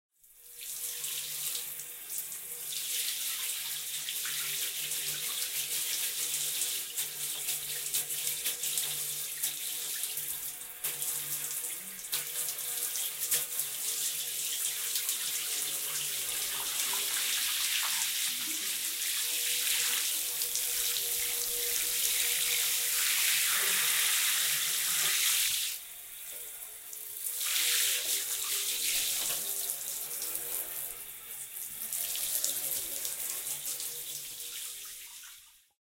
Shower hose
Bathtub being sprayed with water through hose, stereo panning makes it feel like the microphone is in the water.
shower, splash, water, flush, hose